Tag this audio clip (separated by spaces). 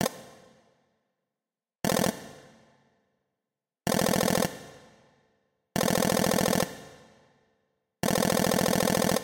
dialogue game nice rpg sound-fx typing